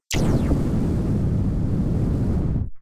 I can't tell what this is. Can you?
I made this by altering the frequencies of an explosion I made by blowing into a microphone.